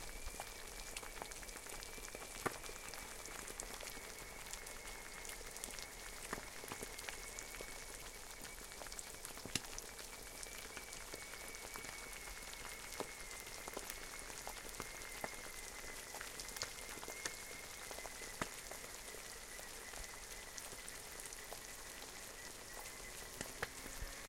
[pl] Smażenie jajecznicy na maśle
V4V
[eng] Frying scrambled eggs in butter
V4V rulez